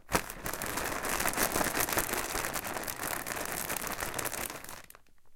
Inflated ziploc bag crumpling
Crumpling a sealed and inflated ziploc bag. Recorded using a Roland Edirol at the recording studio in CCRMA at Stanford University.
bag, crumple, plastic, plastic-bag, ziploc